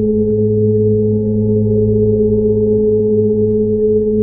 The sounds in this pack were made by creating a feedback loop of vst plugins in cubase. Basically, your just hearing the sounds of the pluggins themselves with no source sound at all... The machine speaks! All samples have been carefully crossfade looped in a sample editor. Just loop the entire sample in your sampler plug and you should be good to
go. Most of the samples in this pack lean towards more pad and drone like sounds. Enjoy!